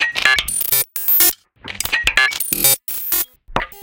ArpingClicks 125bpm04 LoopCache AbstractPercussion
Abstract Percussion Loops made from field recorded found sounds
Abstract, Loops, Percussion